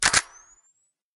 Camera with Flash Sound and then Charge (fast)
Both sounds togeather mixed up and speeded up sounds Awesome.
Big thanks to DaKitsune & organicmanpl
Trying to find a sound like I made now out of those for years and I love the outcome
Organicmanpl's D7100 Capture sound + Dakitsune's old camera flash and some percussions jointed and added modular synthesis
mixed up and brewed by me
Action, Charge, Fast, Flash, game, impact, Nightvision, ramp